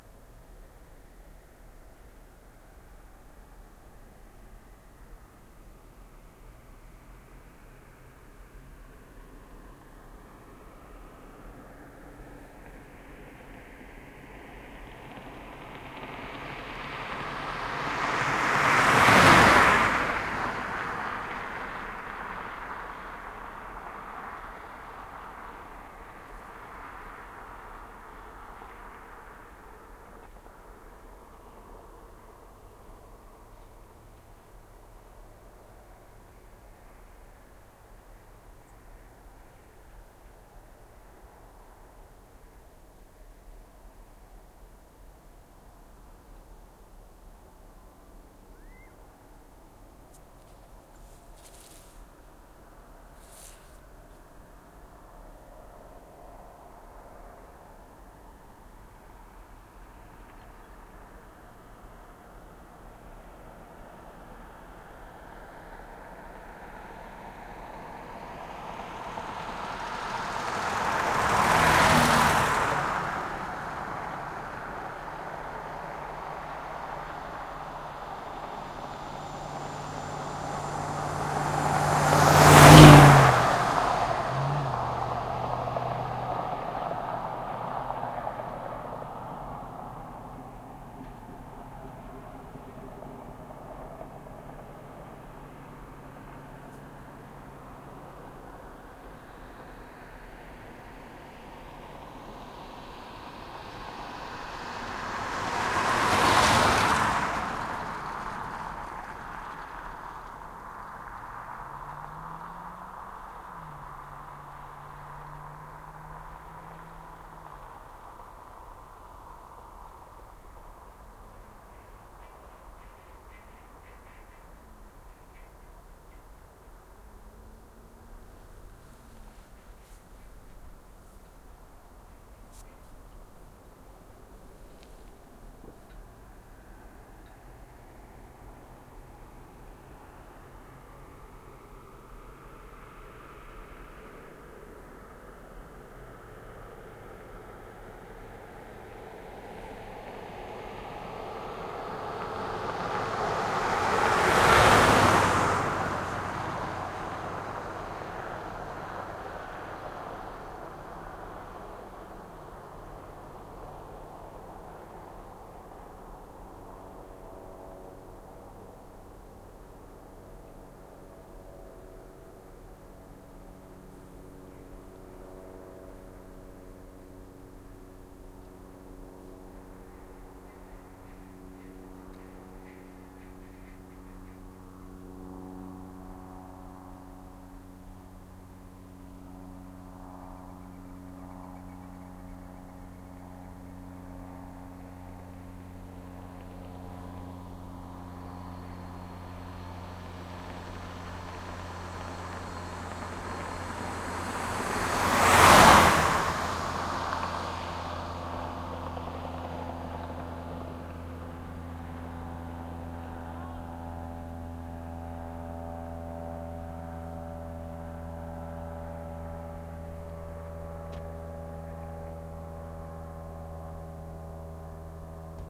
traffic light desert road cars pass by fast good detail CA, USA
road, desert, cars, by, fast, good, traffic, pass, light, detail